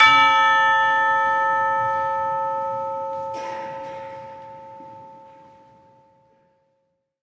In this case we have managed to minimize audience spill. The mic was a Josephson e22 through a Millennia Media HV-3D preamp whilst the ambient partials were captured with two Josephson C617s through an NPNG preamp. Recorded to an Alesis HD24 then downloaded into Pro Tools. Final edit and processing in Cool Edit Pro.